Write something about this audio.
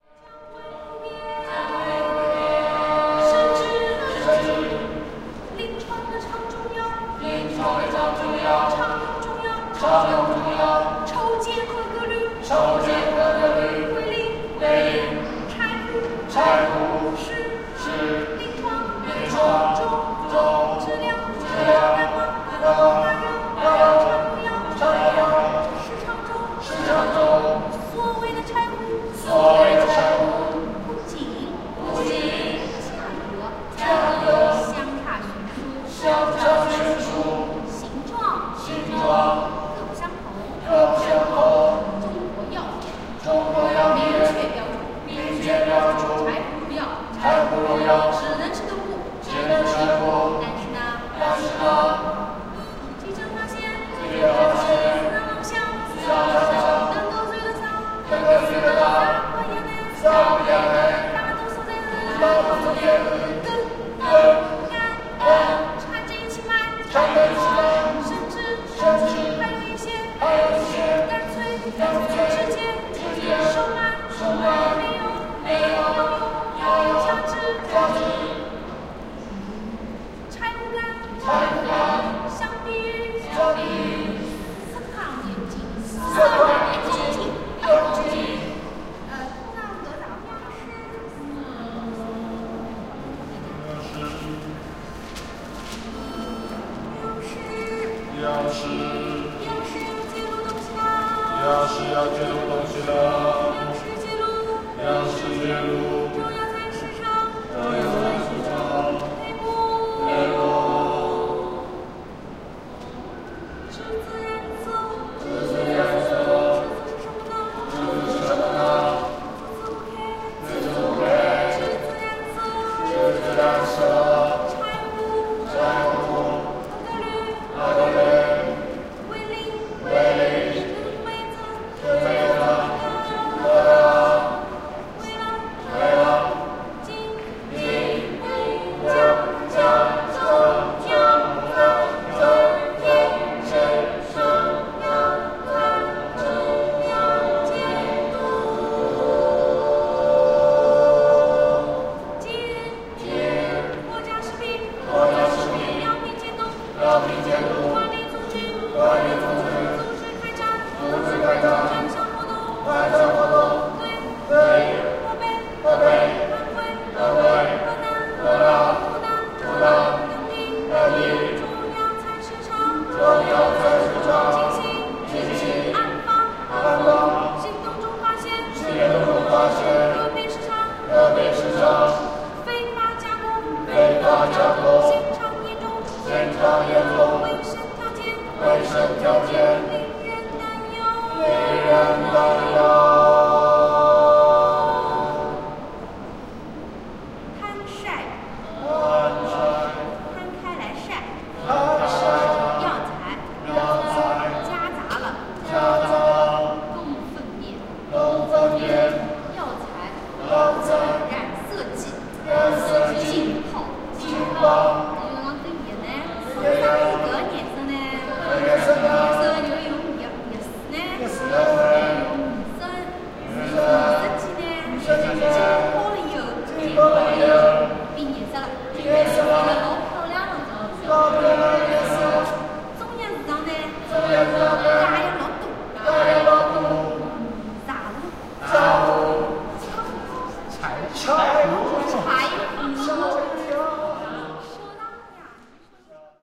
Chinese Vocal Group, Shanghai Biennale
Chinese vocal group recorded on the opening night of the Shanghai Biennale, People's Republic of China